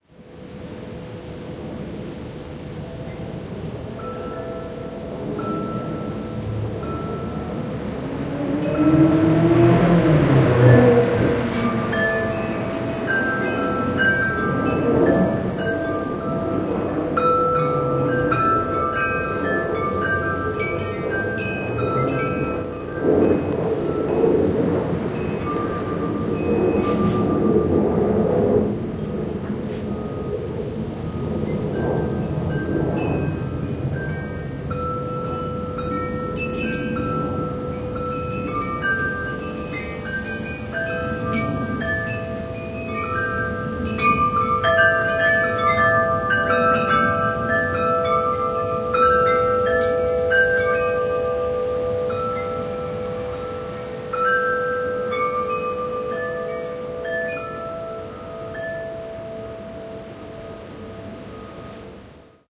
jet bike chimes
Same again, accidentally left camcorder on and this came out.
slight "blip"at 22.8sec was patched (suspect fault in tape) and one + level of loudness added using Adobe Soundbooth CS3.
Just noticed, toward end, children laughing in the street.